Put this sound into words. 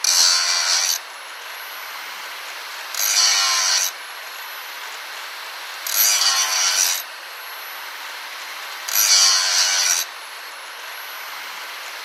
Angle grinder - Fein 230mm - Grind 4 times
Fein angle grinder 230mm (electric) touching steel four times.